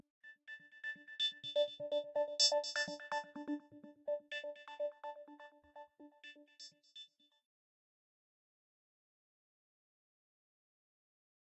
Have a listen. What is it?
made with Sytrus VST.
fx, highpitch, sparkles